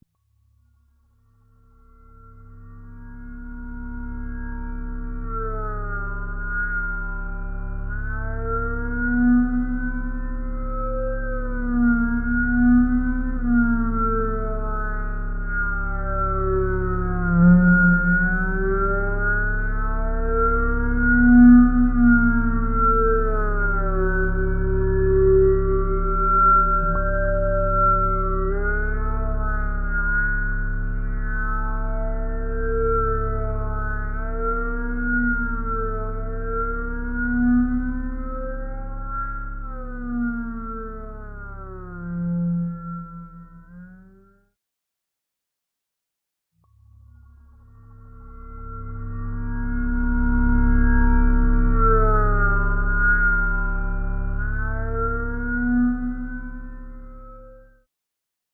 THE DARK FUTURE
Psycho confusion state is a disturbing eerie sample that can be used to accentuate scenes of intense psychological distress.